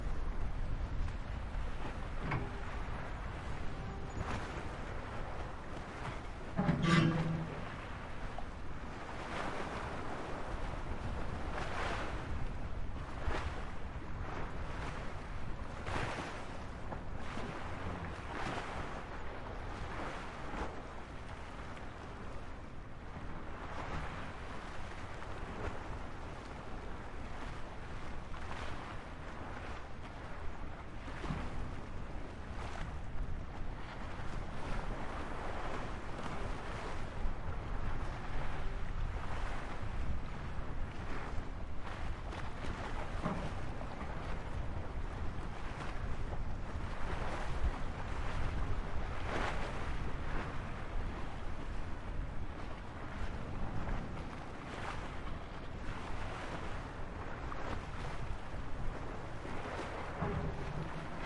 Ambience EXT day waves water in wind landing place
Field Recording done with my Zoom H4n with its internal mics.
Created in 2017.